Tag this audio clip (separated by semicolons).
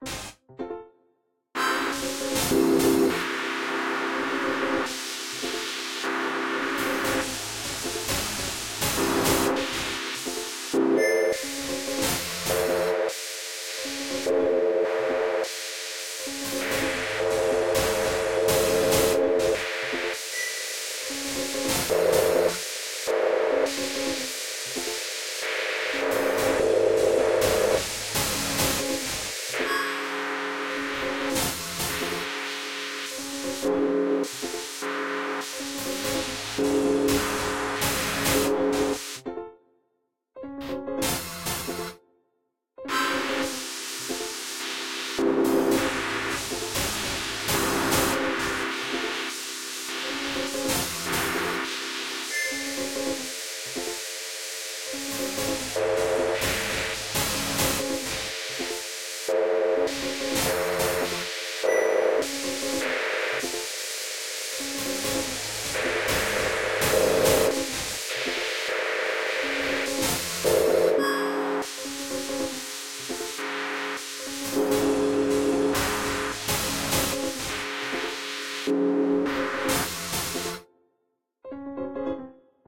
acusticos; eletronicos